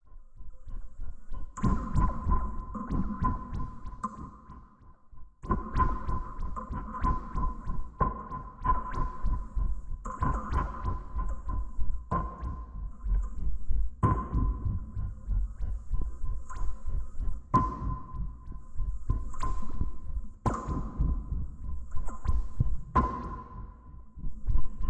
Space echo
The clip resembles a very sci-fi echo like noise. The raw recording was done with a homemade contact mic placed upon metal lockers, which were hit by hand.
echo, space, sci-fi